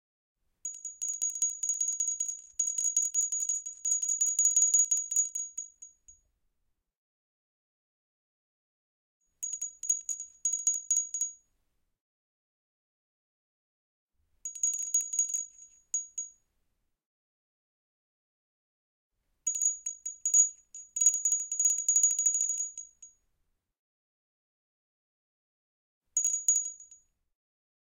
02 - Tiny pottery bell
CZ, Czech, Panska